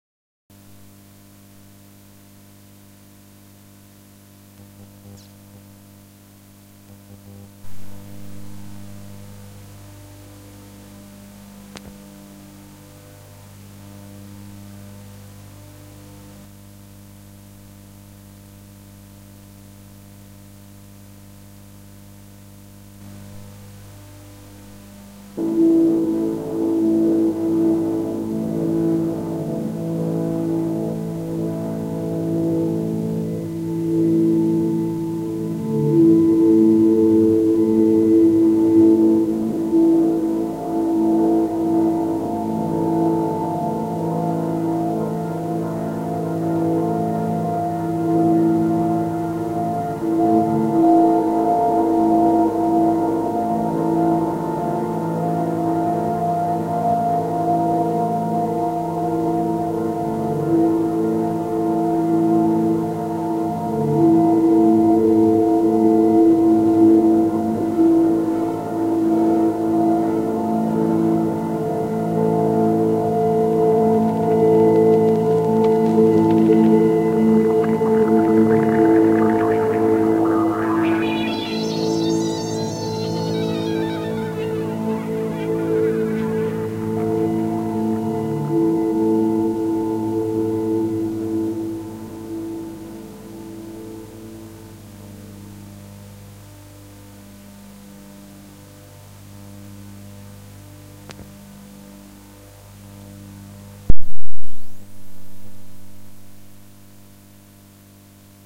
Jeff 02 100 Normalized
This is the second iteration which started with jeffcarter's sound recorded to tape at different volumes, and did it again.
Because I am trying to maximize the effects of tape, I took the previous sound I recorded at the highest volume:
This sound was recorded from the computer to cassette with the computer sound output volume at 100% of full volume.
(I discarded the sound at 25% volume as the hiss was building up too much)
This is actually quite a nice one. I believe there is a fair amount of cable noise in there too (the cables to and from the computer and stereo were running close to the laptop powersource).
Also, there is a 'blweeep' sound when the tape started playing. This is actually difficult to get on modern cassette players, because the amp often does not engage until 2 seconds or so after the tape starts playing. So the moment when the cassette starts playing and the tape is tensioned (producing the 'blweep' sound) is missed...
Listen for these at the start of the sample.
cassette, collab-2, saturation, Sony, tape, volume